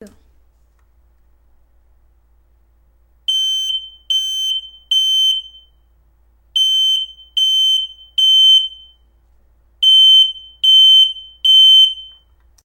fire house alarm
A brief recording of a home fire alarm.
alarm, alert, emergency, fire, house, warning